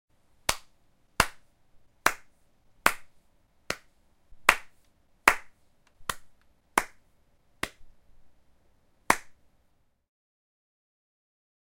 single person clap quicker
One person (me) clapping faster. Recorded with Zoom H4